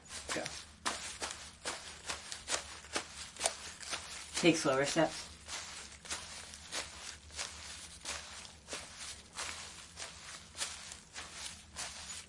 taking footsteps through a forest.